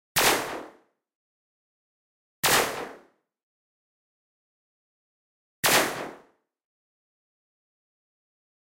Large laser beam
Have fun!
space, sci-fi, laser